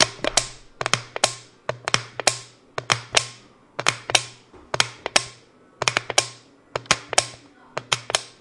tin can
Essen, Germany, January2013, SonicSnaps